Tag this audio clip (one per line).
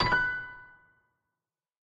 game
menu
pause
piano
videogame